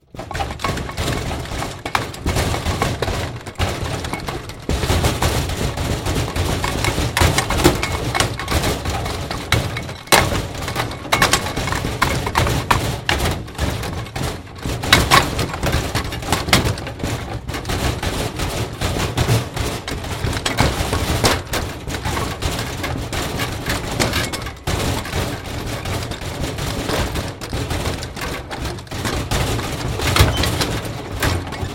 metal rollcage rattle 3 heavy
Foley SFX produced by my me and the other members of my foley class for the jungle car chase segment of the fourth Indiana Jones film.
heavy, rattle, rollcage